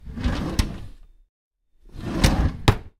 drawer open close 02
drawer open close
close, drawer, open